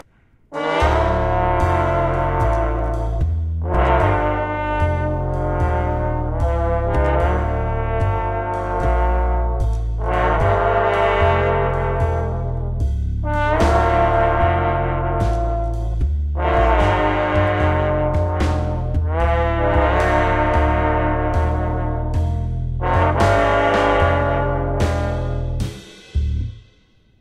Sleazy Trombone intro
A short brassy intro for some slim-ball hittin' on your pal...
big, trombone, dramatic, brass, band, sleazy, jazz, cinematic, intro